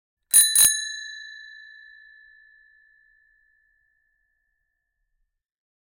bicycle bell 02
Sound of a bicycle bell. Recorded with the Rode NTG-3 and the Fostex FR2-LE.